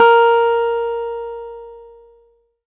Sampling of my electro acoustic guitar Sherwood SH887 three octaves and five velocity levels